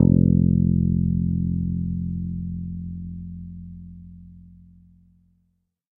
First octave note.